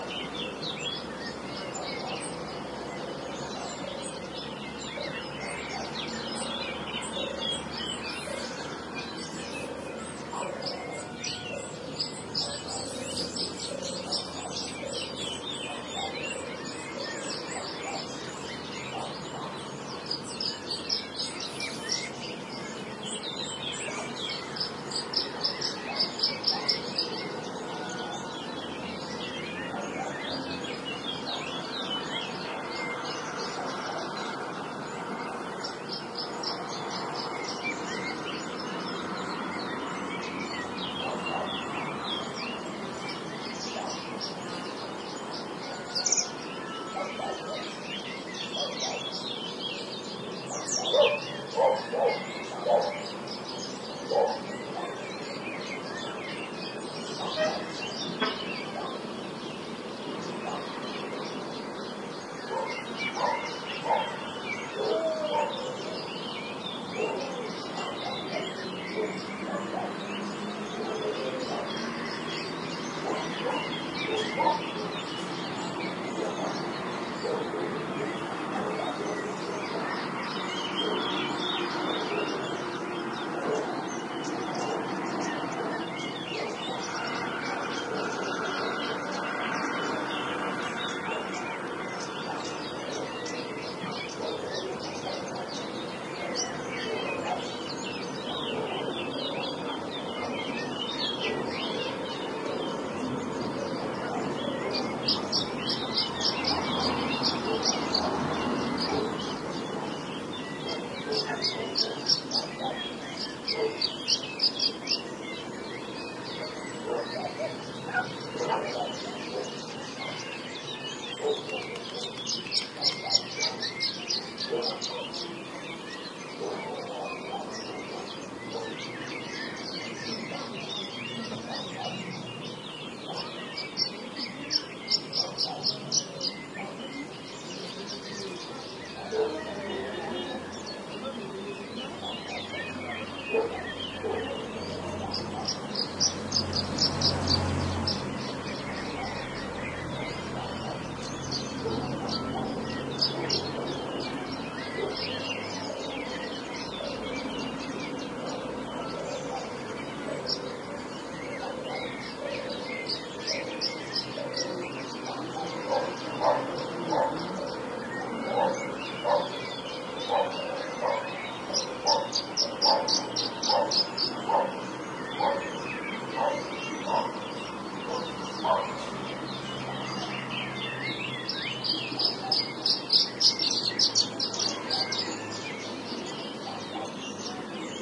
20110504 02.utiaca.ambiance
rural ambiance at the little village of Utiaca (Gran Canaria), including many birds singing, barkings from dogs, along with some traffic noise. PCM M10 internal mics
ambiance, atmosphere, birds, canaries, countryside, field-recording, nature, rural, spring, village